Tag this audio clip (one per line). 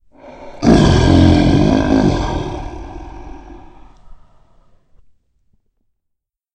angry
fake
horror
lion
cat
jungle
growling
monster
exotic
beast
feline
scary
scare
animal
growl
scream
creature
roar